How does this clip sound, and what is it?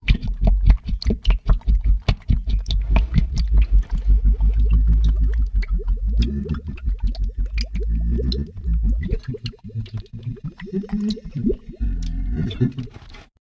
Wet BigAbstractBubbles
This is part of the Wet Sticky Bubbly sound pack. The sounds all have a noticeable wet component, from clear and bubbly to dark and sticky. Listen, download and slice it to isolate the proper sound snippet for your project.